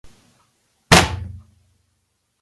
Smash Sound Effect | Smash/knock wood
Smash effect on wood